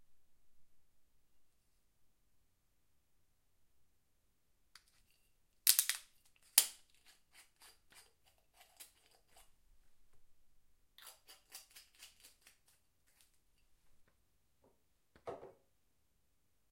Opening and closing a screw-top bottle of wine
Opening and closing a new bottle of wine which has a screw-top. All I could find was popping corks so I made this!
bottle, close, open, screw, screw-top, wine